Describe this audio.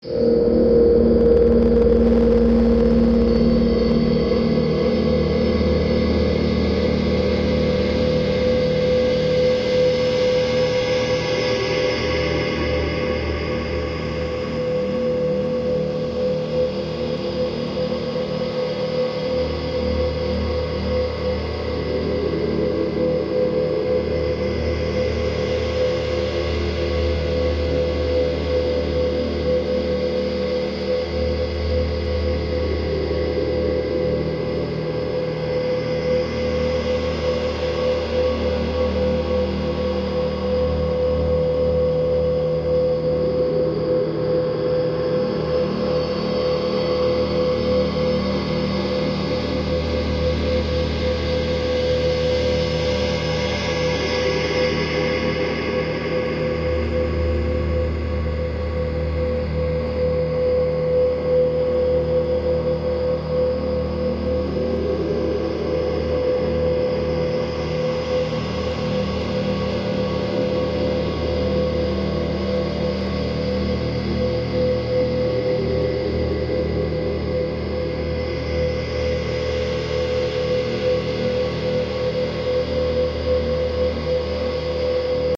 CWD LT approaching 1
science-fiction, sci-fi, drone, soundscape, pad, atmosphere, ambient, fx, melancholic, epic, sfx, deep, ambience, dark, space, cosmos